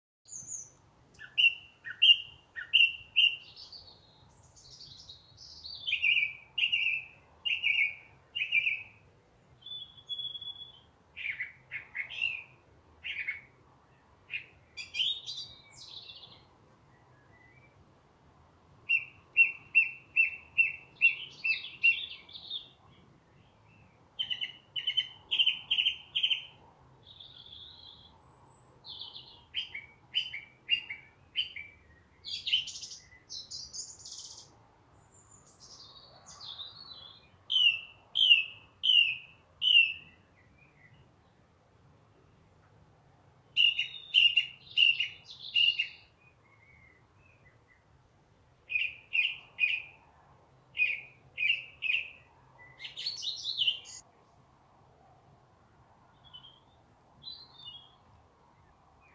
The loud and clear song of a Song Thrush.
bird, birds, bird-song, birdsong, field-recording, nature, song-thrush, spring, thrush